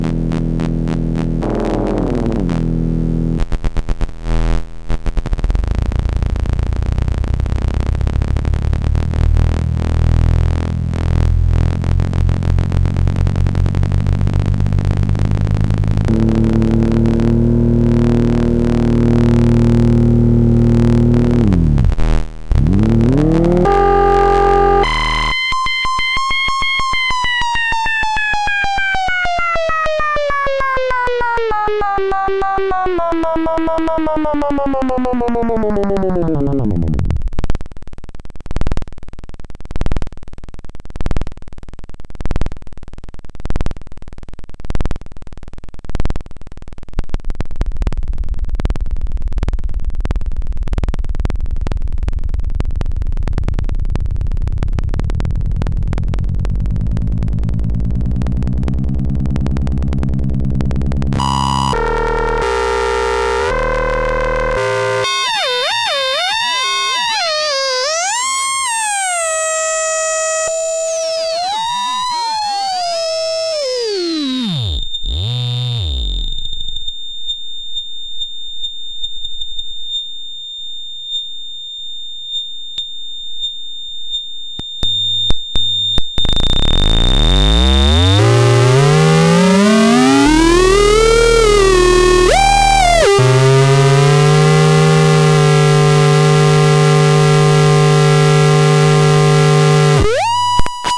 Ring Modulator Session LM13700
Simple 4 quadrant multiplier done with a LM13700 and some components